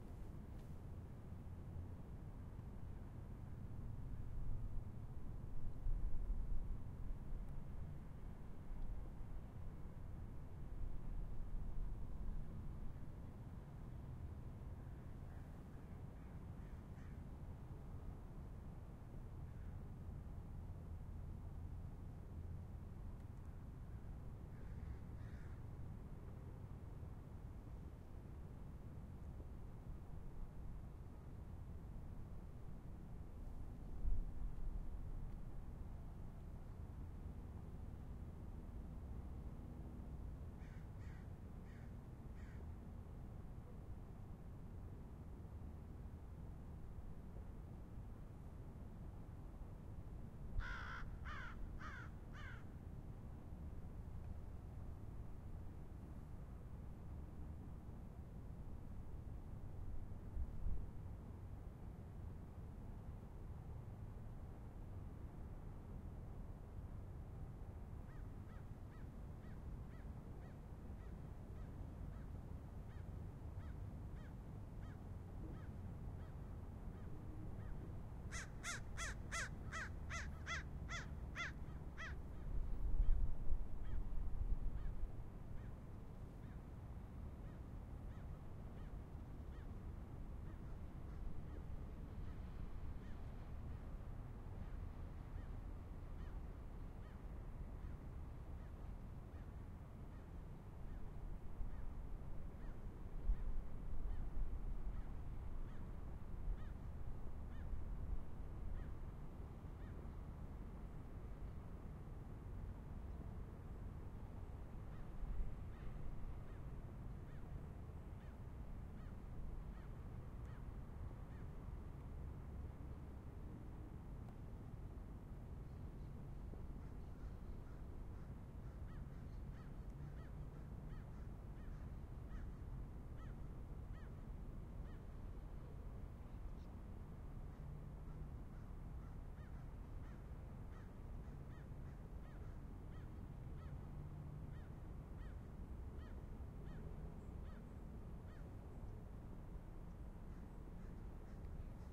A bit of a day in a park, the Westwood Park in Los Angeles, CA, USA, to be exact.